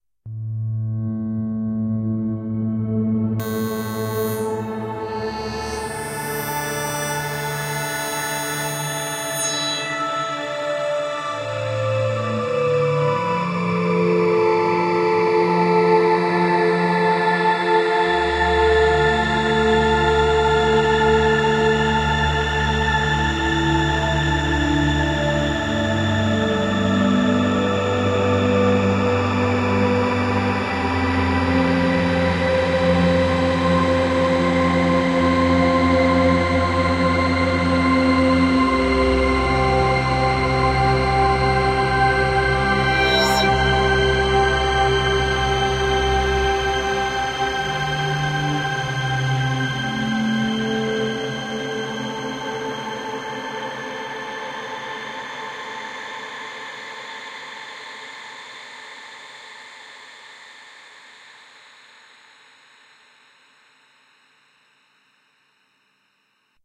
Colder Light
Recorded from the Synthesizer Behringer DeepMind 6
intro, pad, synthesizer